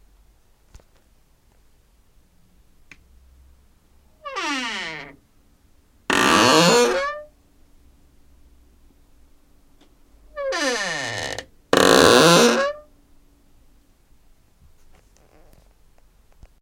squeek doors
sound of a squeeky doors
sound, high, squeeky, pitch, doors, squeek